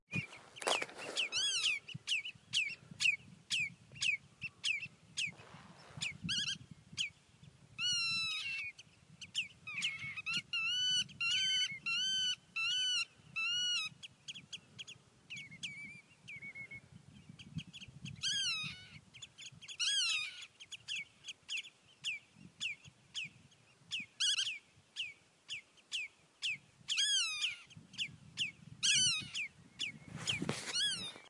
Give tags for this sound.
bird; birdcall; birdcalling; birds; birdsong; call; calling; eggs; field-recording; Iceland; icelandic; nature; protection

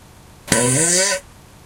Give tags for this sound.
explosion; fart; flatulation; flatulence; gas; noise; poot; weird

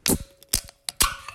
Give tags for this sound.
can; open; soda

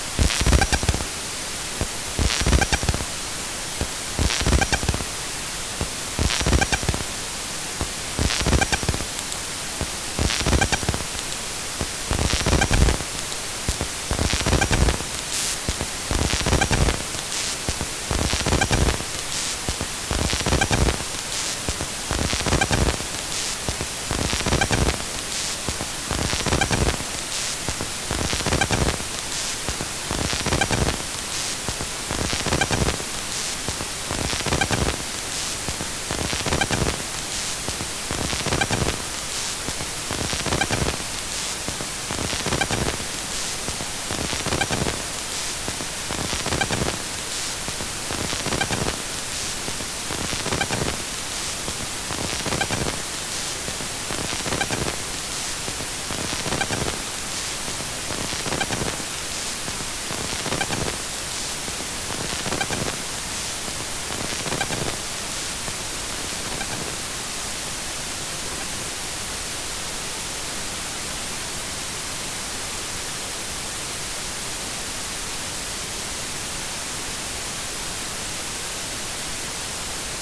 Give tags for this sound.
noise; circuit-bent; loop; atari

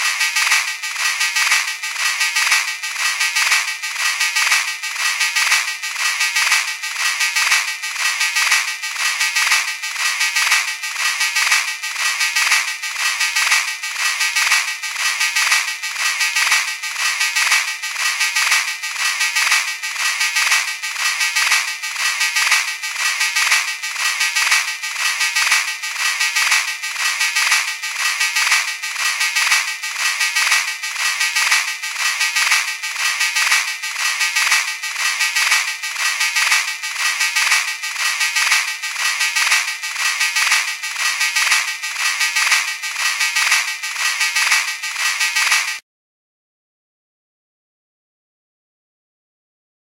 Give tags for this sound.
ambient; beat; mix

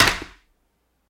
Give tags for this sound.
Leather; Alexander-Wang; Hardware

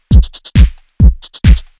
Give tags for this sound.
kick; drum; trance; electronica

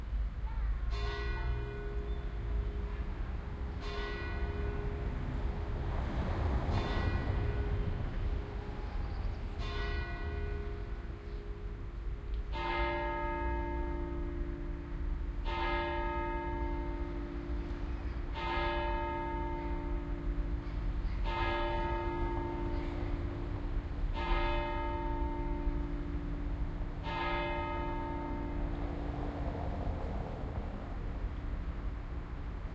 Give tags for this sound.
bells; binaural; church; churchbells; field-recording

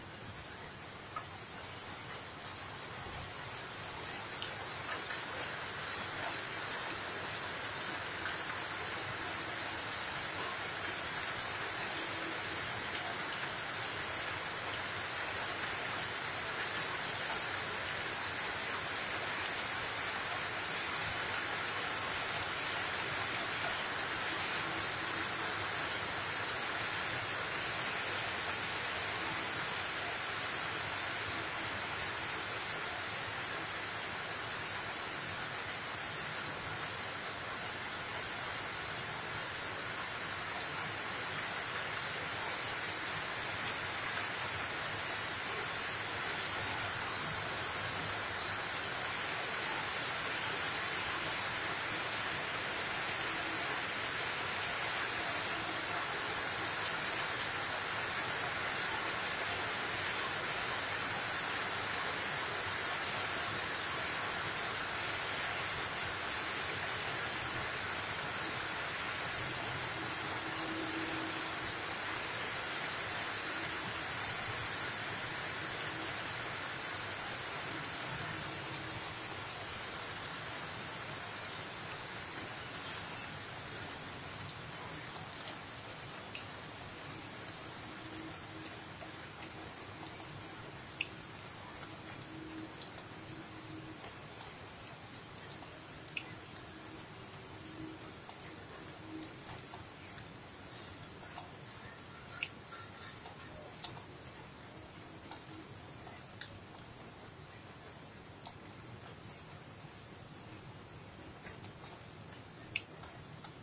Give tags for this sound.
Raining; Rain

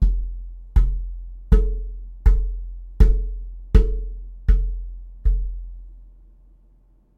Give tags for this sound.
boom; coller; office; water-cooler-bottle

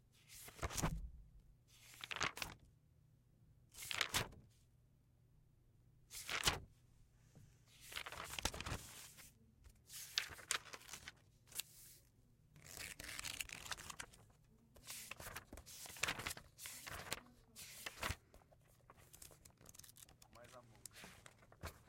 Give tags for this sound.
uam; book

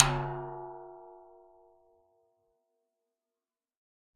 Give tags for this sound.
1-shot; drum; multisample; tom; velocity

